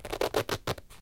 zoom H4.
pulling the yoga mat with my hand and letting it slip.